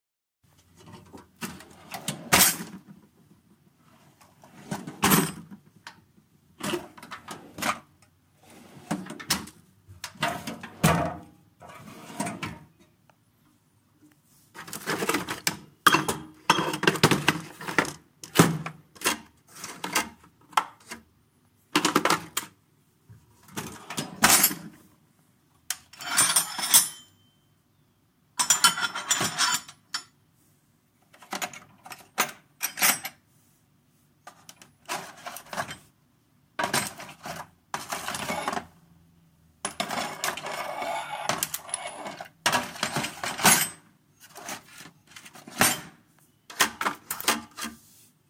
Tools being moved around in a toolbox